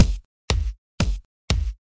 kick loop-09
compressed kick loop variations drum beat drums hard techno dance quantized drum-loop groovy kick